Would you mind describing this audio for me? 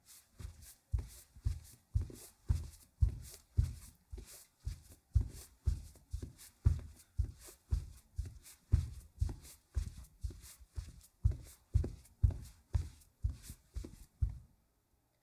01-32 Footsteps, Wood, Socks, Slow Pace
Footsteps, slow pace on wood floor with socks
walking, socks, footsteps, slow, hardwood, wood